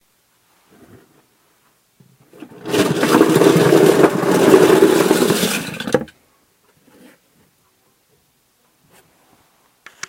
Dumping toy cars#2
A large collection of toy cars being dumped rapidly onto the floor. This sound could be used as is or edited to appear as if on a larger scale.
dump, toy, dumping